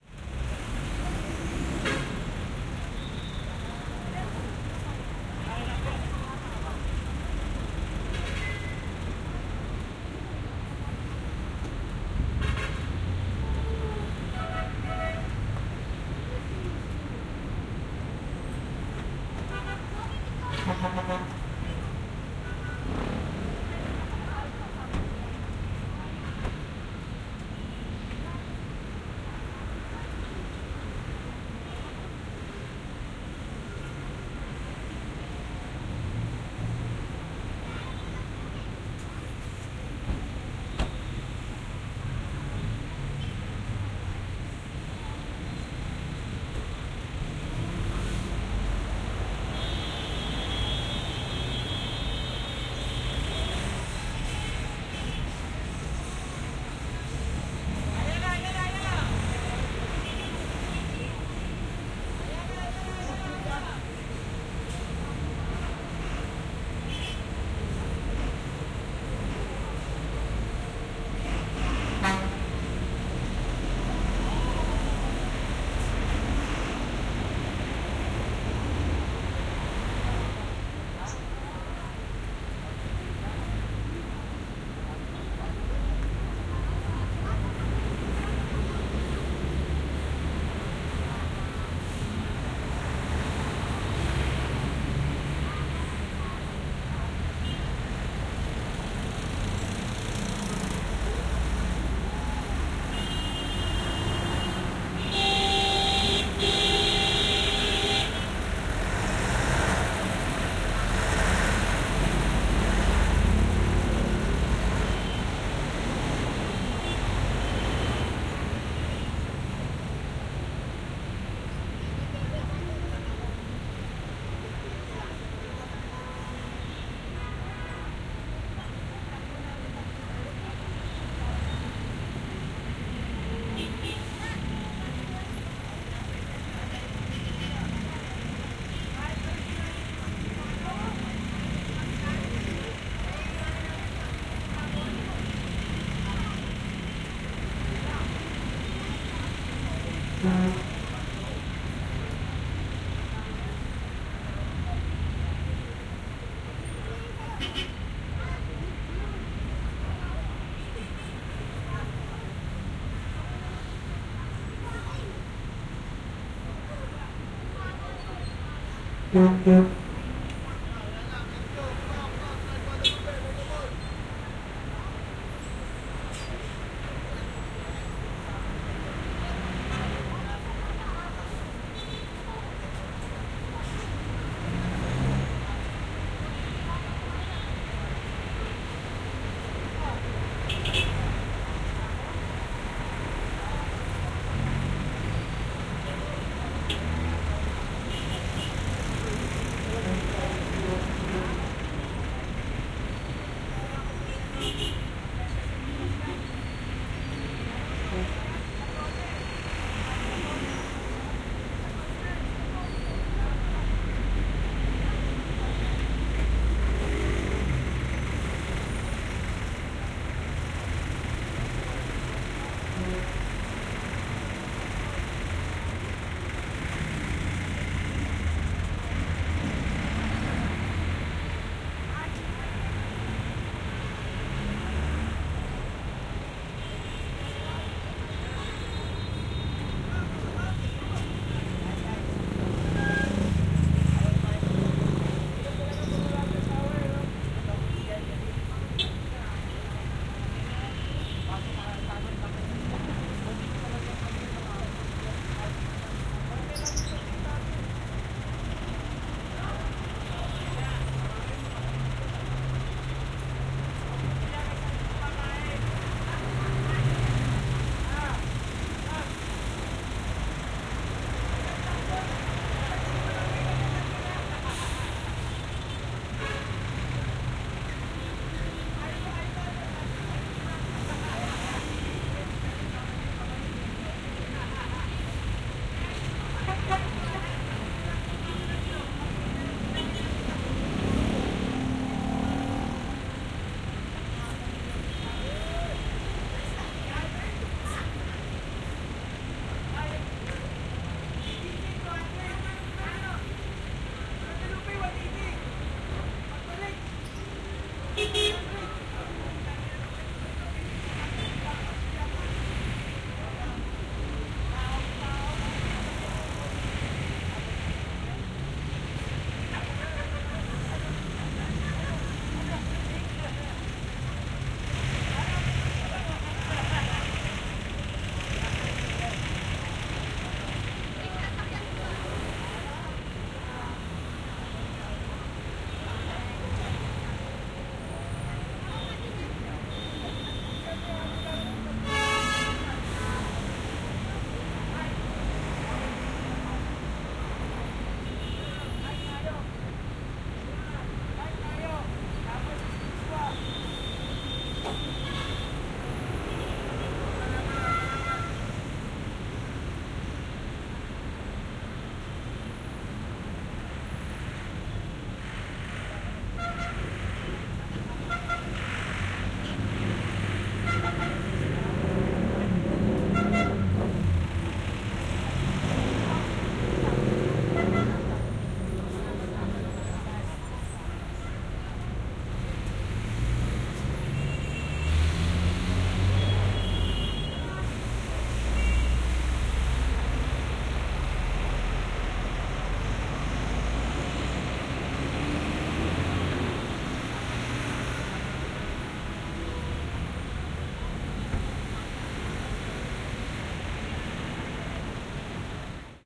LS 33923 PH ManilaTraffic
Heavy traffic in Manila, Philippines (Binaural).
This binaural audio file has been recorded from the side of a big road in Manila.
You can hear heavy traffic with buses, trucks, cars, motorcycles, jeepneys, etc… You can also hear some people talking.
Recorded in March 2018, with an Olympus LS-3 and a Roland CS-10em binaural microphones/earphones.
Fade in/out and high pass filter 80Hz -6dB/oct applied in Audacity.